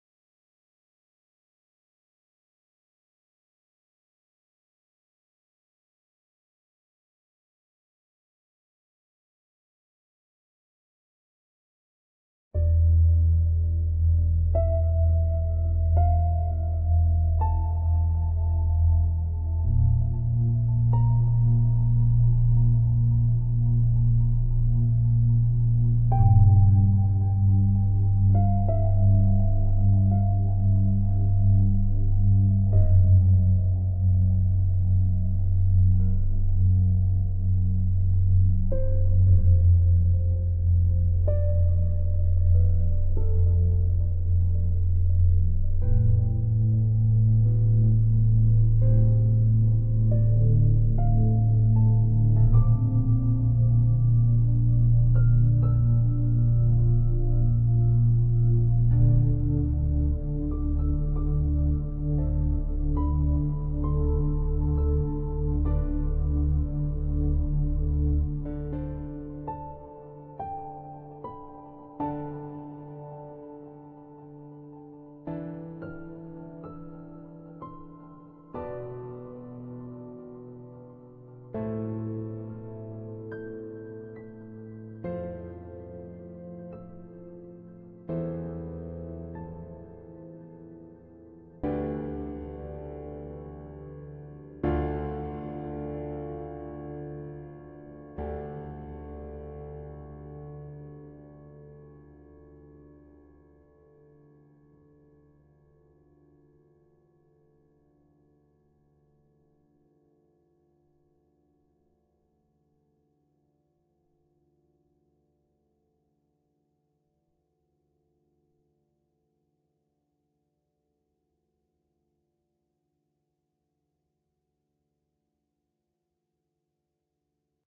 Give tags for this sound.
atmosphere
cold
dark
edgy
melody
menace
moody
nice
sci-fi
space
sweet
thriller

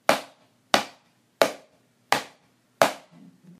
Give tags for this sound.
pasos
shoes
zapatos

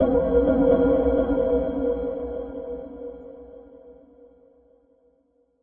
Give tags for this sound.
alchemy
sound-effect
fx

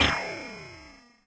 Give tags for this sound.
Gameaudio
Sounds
sound-desing
effects
indiegame
FX
SFX

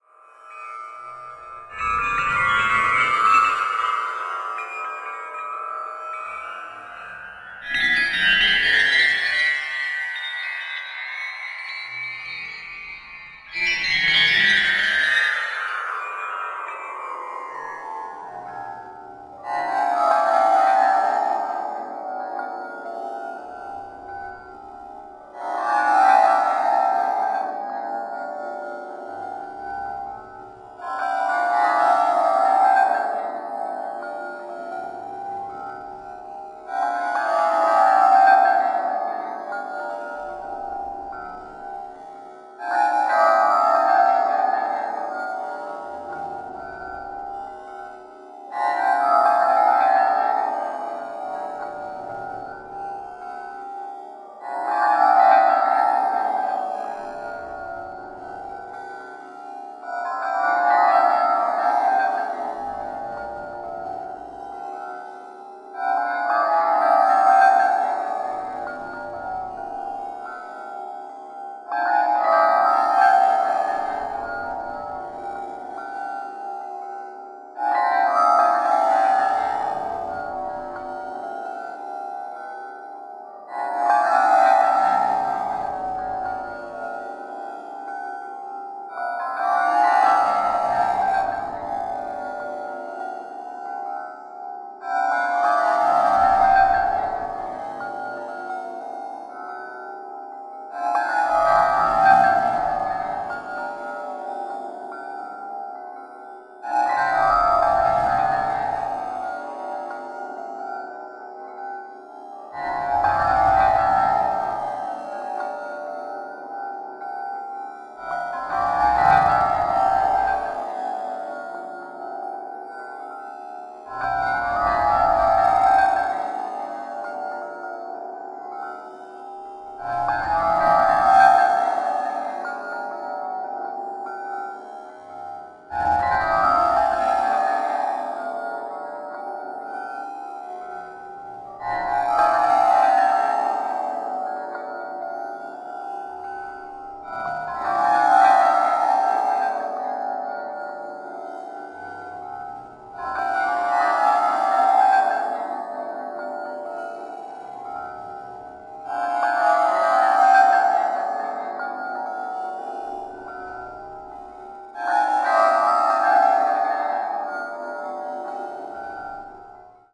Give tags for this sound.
grain
chimes
hells
ambient
distorted
discordant
dark
bells
granular
dissonant

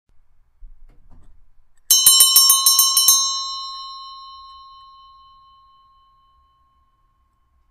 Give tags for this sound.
bell
chime
chiming
ring
ringing

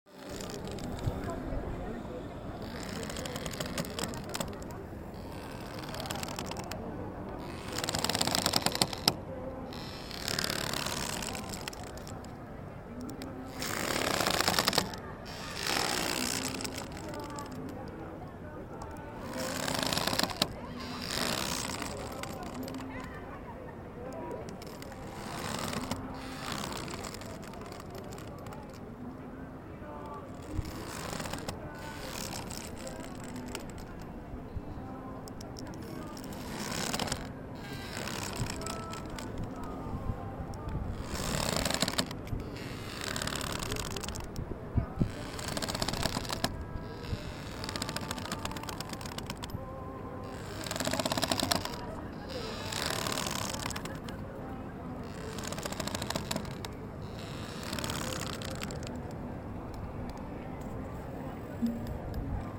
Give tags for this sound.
boat
rope
ship

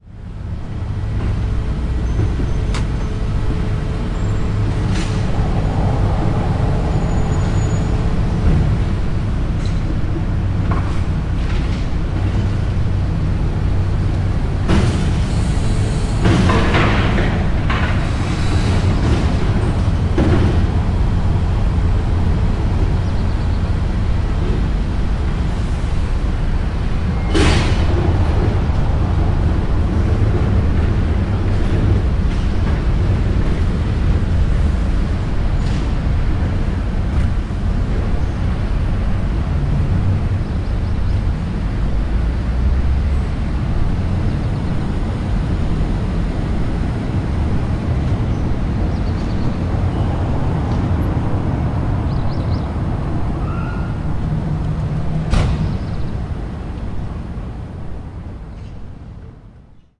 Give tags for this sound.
garbage
dumpster

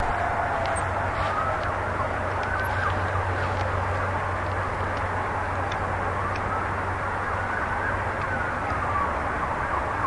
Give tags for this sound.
field-recording
hydrophone

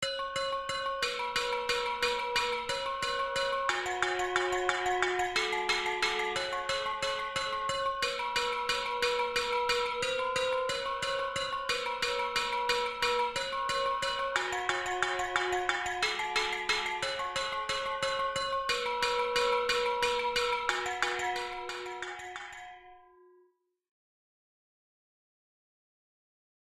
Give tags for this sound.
arp
arpeggiate
bell
ethnic
exotic
full
Indonesian
percussion
rhythmic
sample

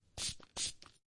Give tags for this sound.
can
spray
spraying

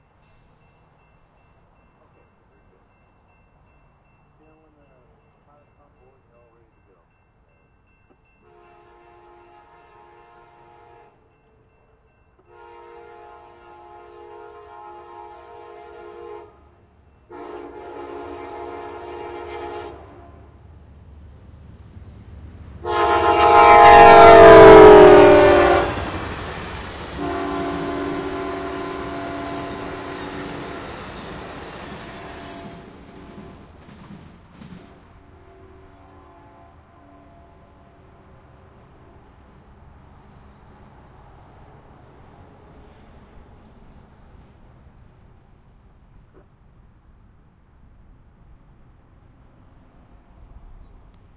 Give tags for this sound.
0159
2011
25
california
il
may
montgomery
pm
zephyr

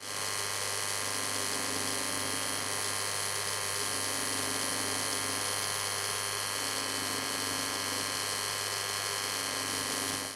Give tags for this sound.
dill
machine
UPF-CS12
wall

